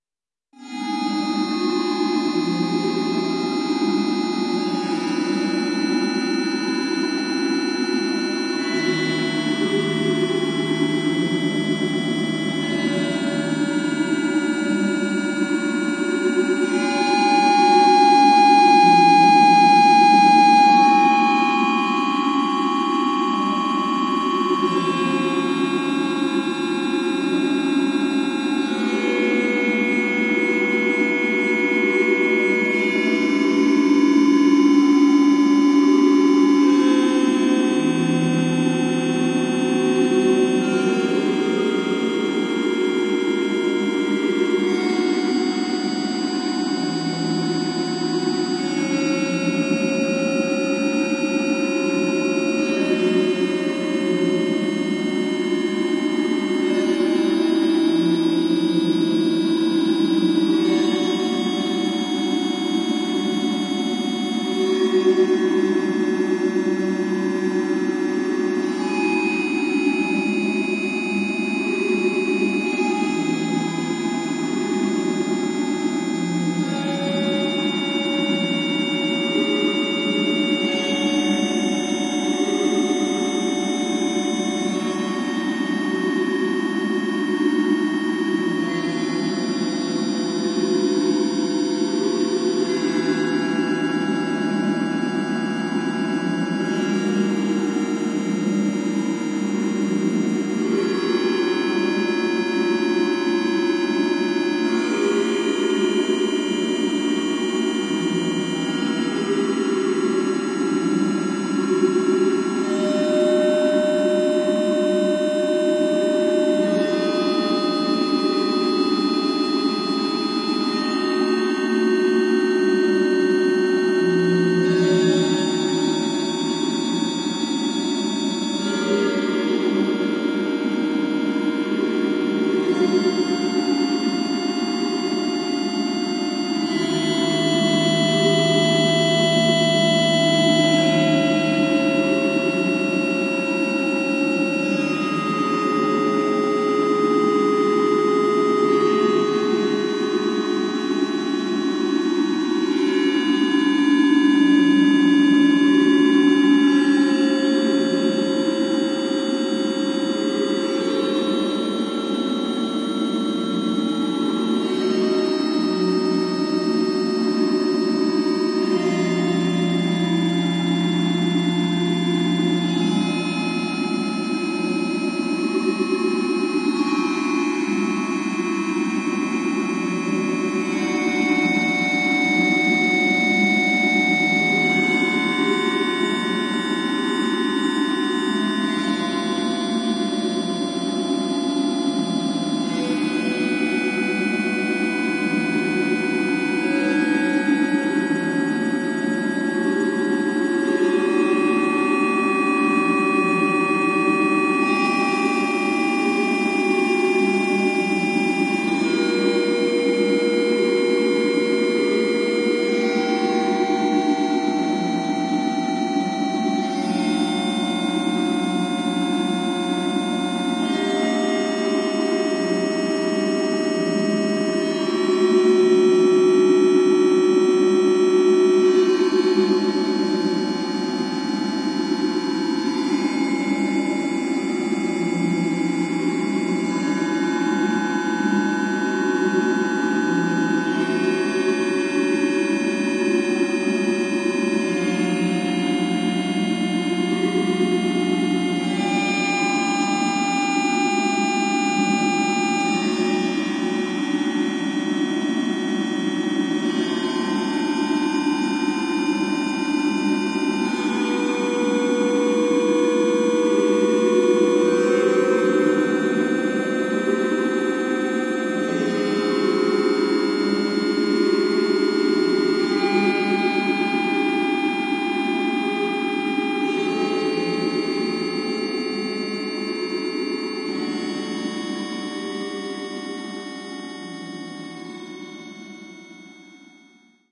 Korg Electribe 2 recorded with a Zoom H-5.
Minimal processing and conversion in ocenaudio.
More drones:
50s, 60s, atmosphere, creepy, drone, fx, groovebox, haunted, sinister, space, spooky, synthesizer, weird